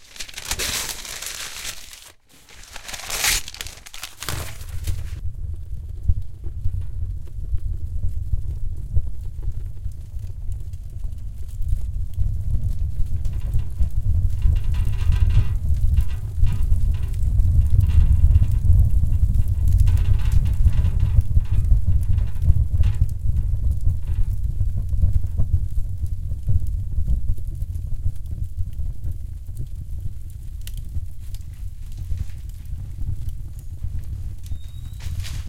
I crumpled up some newspaper, applied a lighter's flame, threw it into an old 1940s wood burning stove and got a roaring fire going. The intensity of the fire rumbles the glass on the front of the stove.